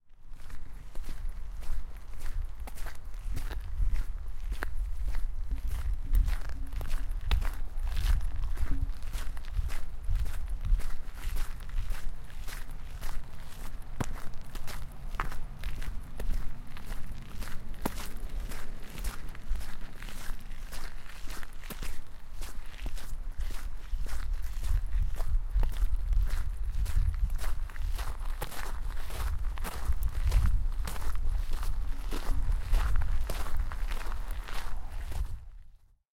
Walking, gravel
A recording of me walking on gravel towards Klosterängshöjden in Northern Lund, Sweden.
field-recording, ambience, gravel, nature, foley, hill, ambient, Walking